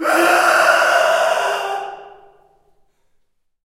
Male Scream 4
Male screaming in a reverberant hall.
Recorded with:
Zoom H4n
agony, yell, shriek, squall, screech, squeal, steven, torment, cry, hoes, reverb, schrill, dungeon, screak, fear, human, scream, male, pain